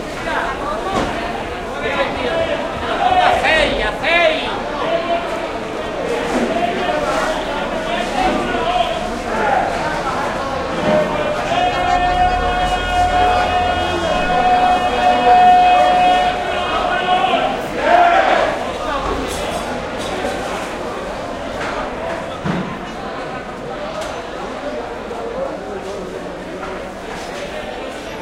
shouts and ambient noise at the Sanlucar de Barrameda market, in S Spain. PCM M10 with internal mics